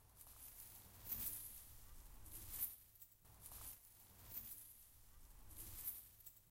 SFX for the game "In search of the fallen star". Plays when the player traverses the forest.
forest, leaves, wind, tree, falling